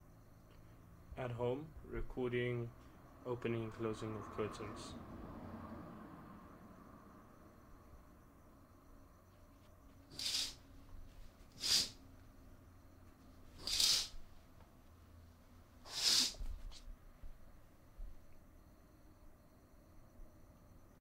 Closing Curtains
closing, curtains